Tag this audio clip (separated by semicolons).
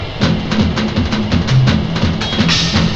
accelerate; drums